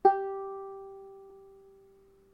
Soft plucking of the G string on a banjolele.